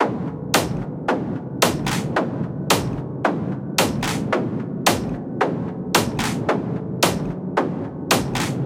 StopDrumLoop111BPM
Drum Loop 111BPM
111BPM, Loop, Drum